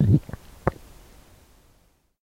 water swallow
swallowing the water
throat, swallow, water